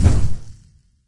Fireball Explosion
The sound of a fireball exploding